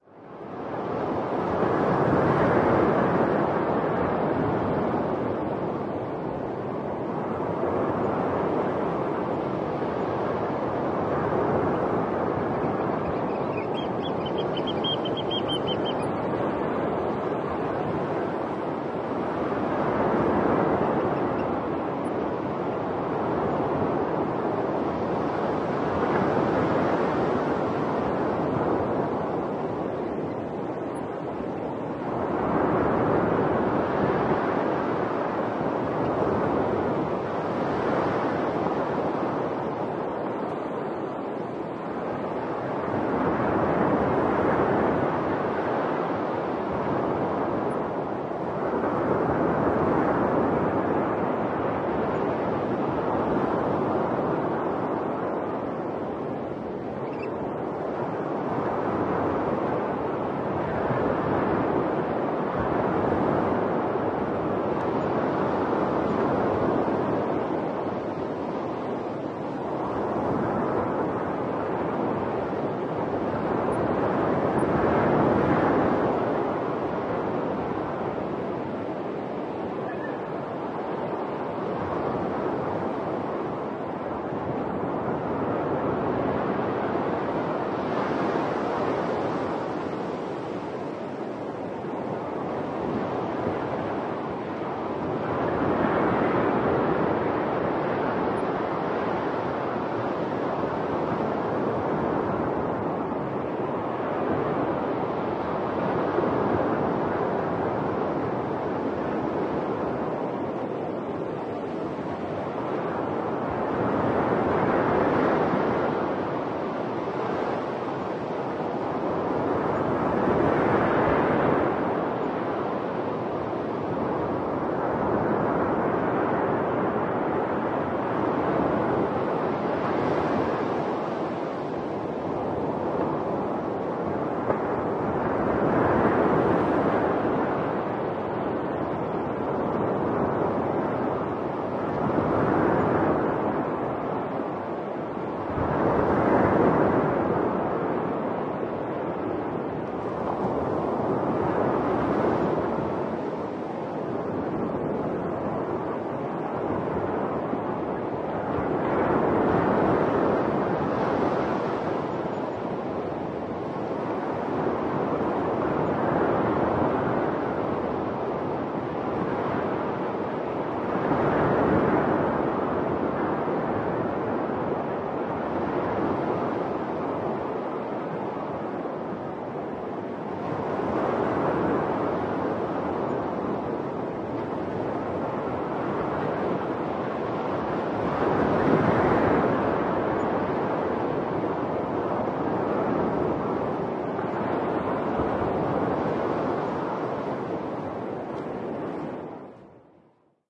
A stereo field-recording at a sandy beach ( Harlech, Gwynedd, UK). Unusually for this beach most of the waves were breaking about 100m away from the water's edge on a bar due to a very low tide so that the sound is not the expected rhythmic breaking usually associated with a beach. Also present are a small group of Oystercatchers (Haematopus ostralegus)and a distant dog. Zoom H2 front on-board mics.